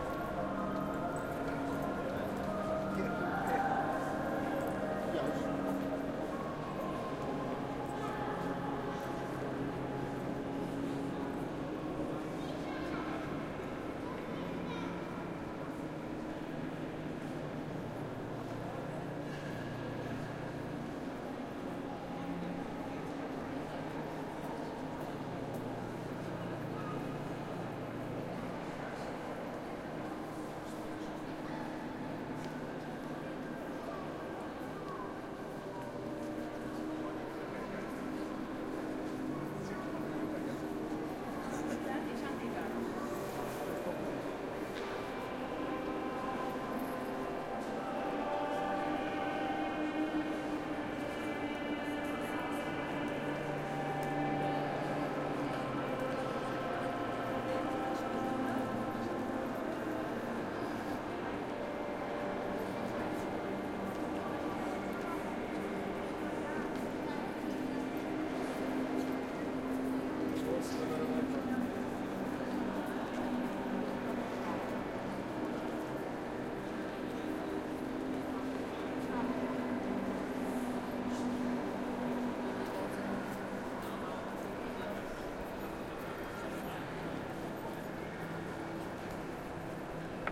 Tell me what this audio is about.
sagrada familia cathedral
This recording is done with the roalnd R-26 on a trip to barcelona chirstmas 2013.
ambience, ambient, atmosphere, background-sound, cathedral, chuch, soundscape